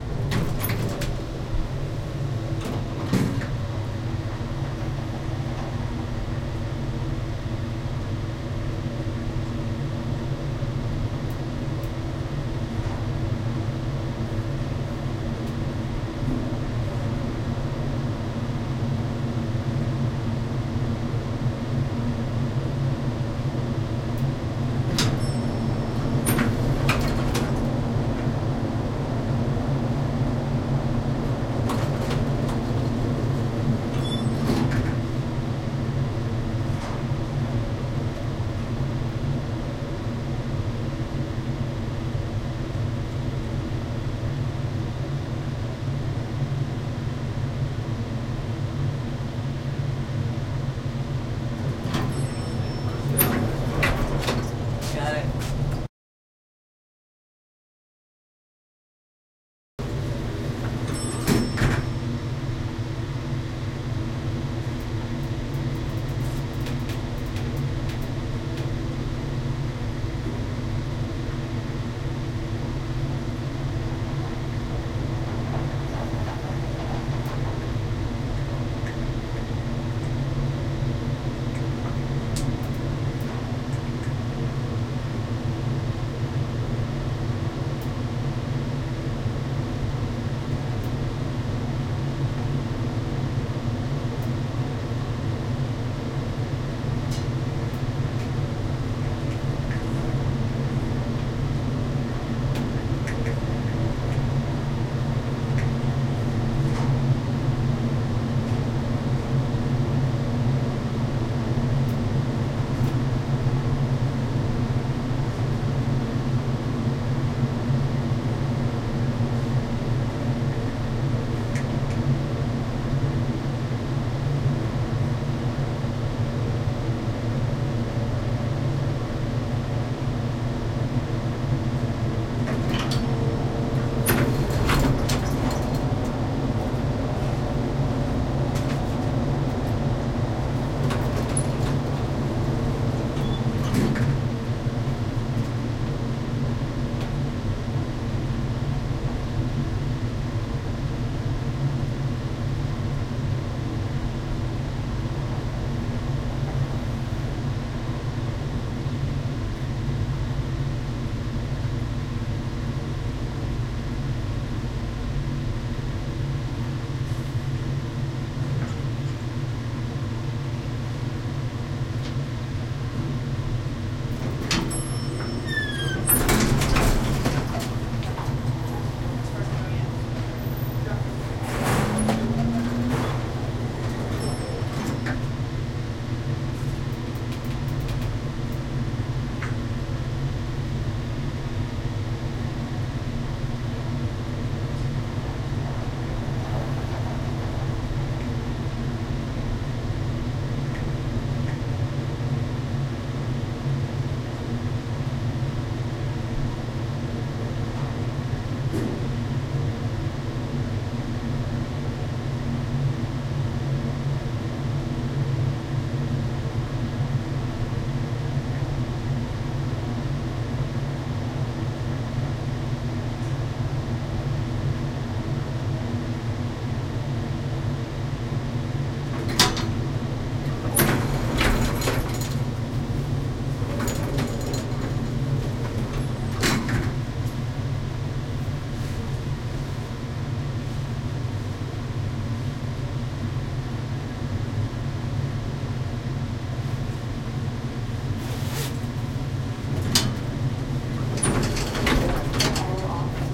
elevator ride with heavy ventilation doors open close
doors, elevator, open